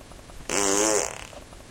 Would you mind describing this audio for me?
fart poot gas flatulence flatulation explosion noise weird beat aliens snore laser space
flatulation, weird, beat, flatulence, space, snore, laser, explosion, gas, aliens, noise, fart, poot